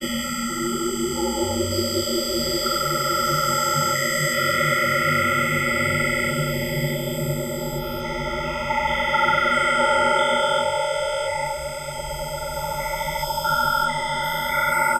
Alien insect space noises made with either coagula or the other freeware image synth I have.